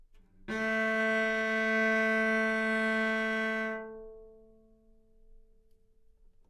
Part of the Good-sounds dataset of monophonic instrumental sounds.
instrument::cello
note::A
octave::3
midi note::45
good-sounds-id::445
dynamic_level::mf
Recorded for experimental purposes